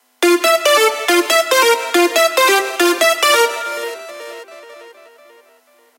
Trance Melody 135 BPM F Major (with effects tail)
This sound was created using a V-Synth GT and processed using third partie effects and processors.
135-BPM, Dance, EDM, Electric, F-Major, Melody, Music, Riff, Sample, Trance